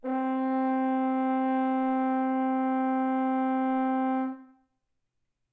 horn tone C4
A sustained C4 played at a medium volume on the horn. May be useful to build background chords. Recorded with a Zoom h4n placed about a metre behind the bell.
c, c4, french-horn, horn, note, tone